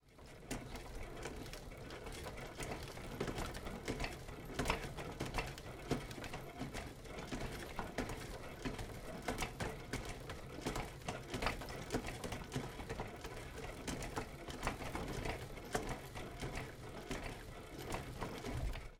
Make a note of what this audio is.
FXSaSc Kettler Kettcar 03 Chain Pedal
Car, Chain, Kettcar, Kettler, Pedal, Toy